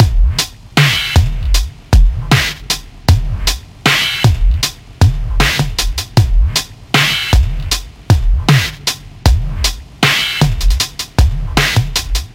This is part of a remix pack of a small selection of beats by Brian Transeau beats, Available in the 'One Laptop Per Child / Berklee Sample Poo'l.
Inspired by the rhythms i wanted to create some beat downtempo beats starting from faster loops. So, remix consist in additional processing that give at least that oldschool triphop feel: each beat was pitched down, filtered hp-lp, reverberated or delayed, distorted/phattened/crushed, normalized to -0.1